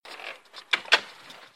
Old door close
A realistic, old closing door sound, for example RPG, FPS games.
door, closing, close